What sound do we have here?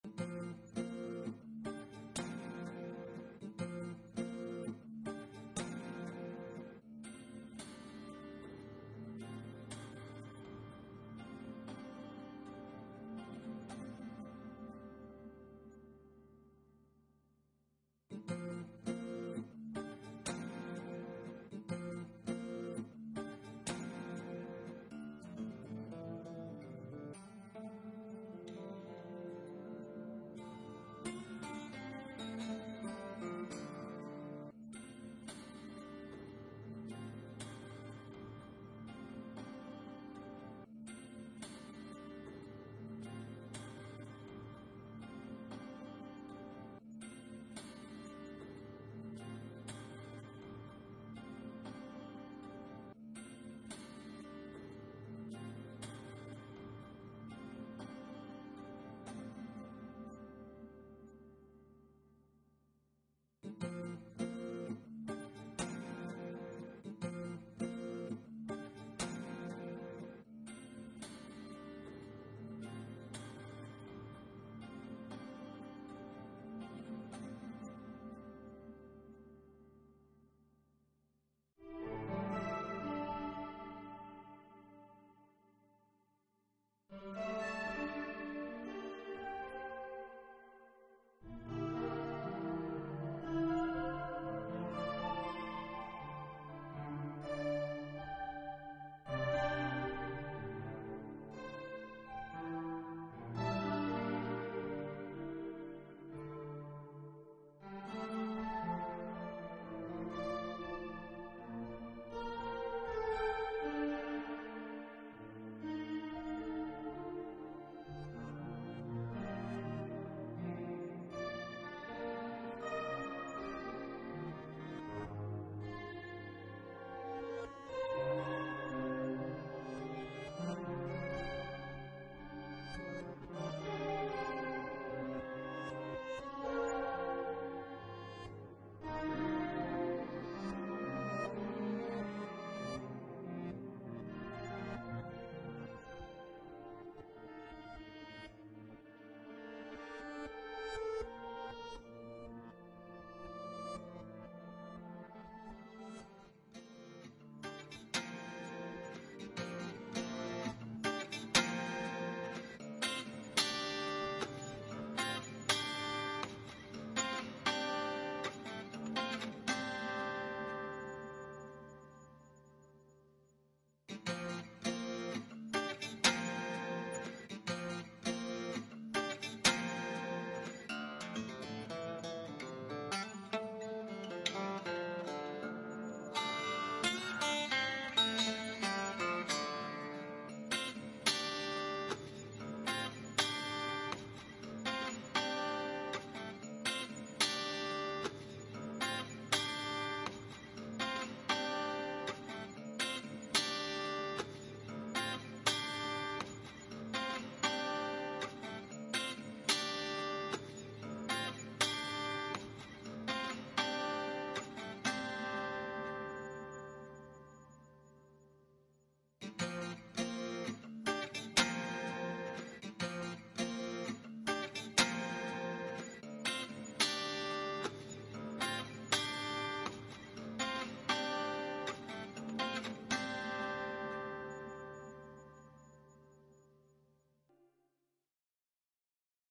This a "song" I've personally created thanks to Mr. ERH guitar's fine production. Also this one has been created with the same goal of other stuff: get the opportunity of playing some bar-lines over it. Many little guitar's frames were at my disposal, so I've just collected some of them, cleaned and put'em together in the way you can hear. Track's title is "WHO.R.U" right 'cause I've not the pleasure to personally know Mr. ERH [which is of course a freesounder]. Hope you can enjoy listening.
notes, rhytm, music, composition, nylon-strings